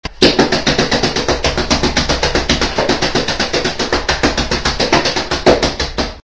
Few punches in a punch pillow.